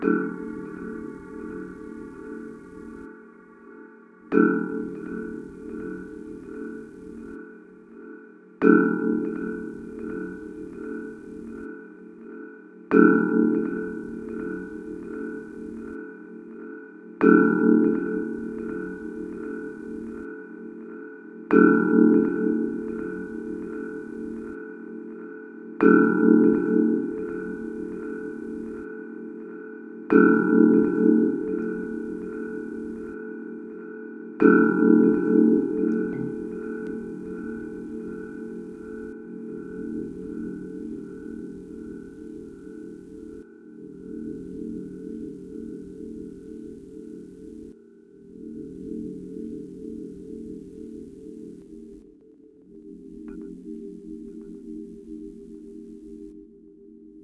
pad 003 deepspace hammered acoustics
deep pad sounds based on mallet sounds, physical modelling
dub, mallet, pad, sounddesign, key